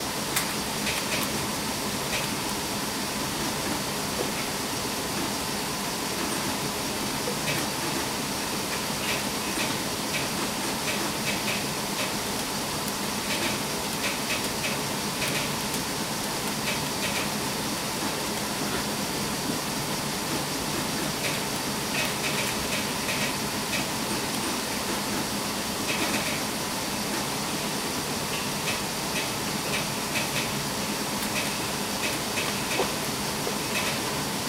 Recorded with Zoom N2 in a Post Service sorting facility. Letter sorting machine.
Tone, Ambience, Room, Indoors, Industrial, Machine